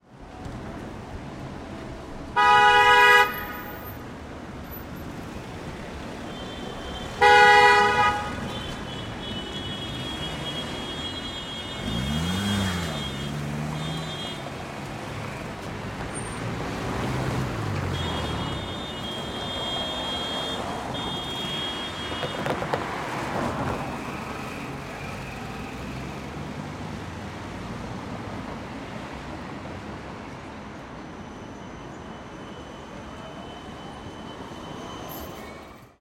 Loud city ambient 01
ambience, Budapest, car, cars, city, field-recording, horn, noise, street, traffic